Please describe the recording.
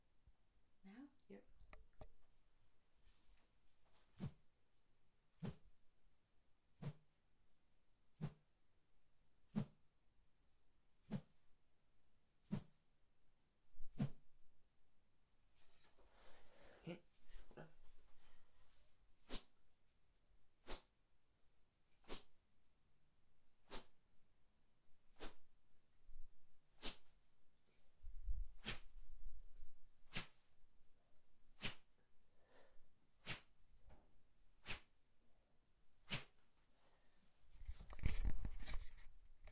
A whoosh sound effect I made with a stick and a squash racket. (Sorry about the talking, I used my mum to help record it lol.)
swing, stick, racket, whoosh